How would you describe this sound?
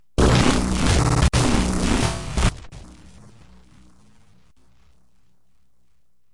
Abstract Glitch Effects 003
Abstract Glitch Effects
Abstract, Design, Effects, Electric, Glitch, Random, Sci-fi, Sound, Sound-Design, Weird